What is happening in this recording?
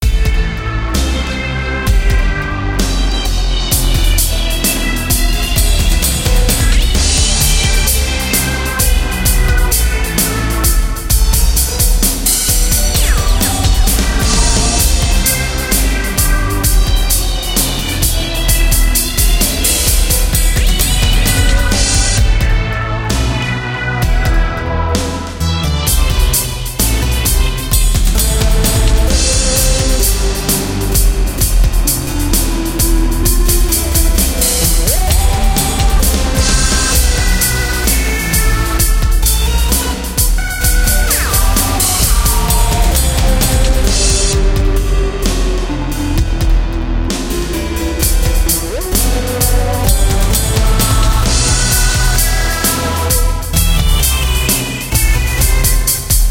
Evil Intent
Original Music Loop ~ Powerful, Evil and Dark. Key of A-minor, 130 BPM.